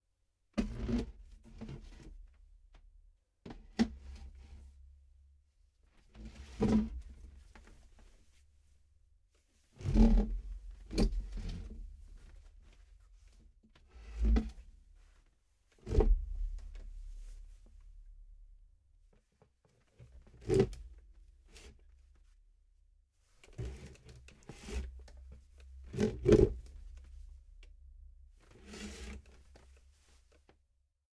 pushing a chair
pushing a wooden chair back for sitting or adjust it for dinner. maybe also for standing up or sitting down on a wooden chair.
seat, dragging, chair, wood, floor, sit-down, furniture